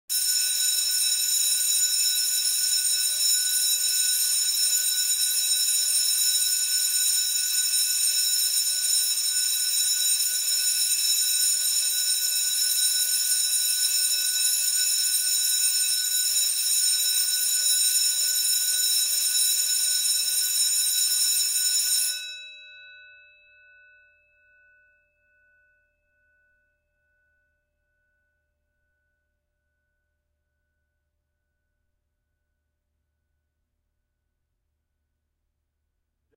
An electric school bell recorded at the Nashua Actorsingers'
rehearsal hall in the summer of 2001. The ring lasts for 22 seconds,
but can be shortened by removing the beginning. The echo lasts 14
seconds, but can be faded early if necessary. The recording was made in
a stairwell using a stereo microphone on a Roland VS-1680 at 44,100
samples per second.
electric, school-bell